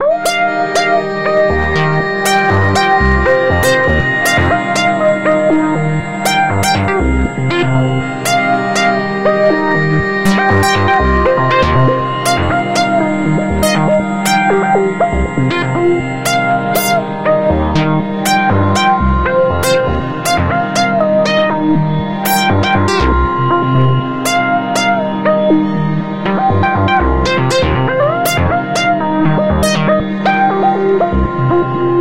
Sacha Rush - Mathention (Acid Melody Loop With Harmonic Ambients)
And please send links where you have used any of my samples as it is interesting.